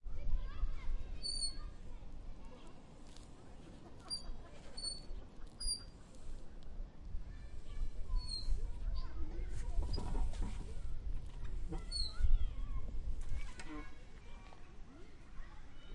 Moving some parts of a jungle gym